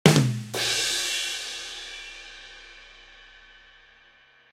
Classic comedy rim shot, made in Garageband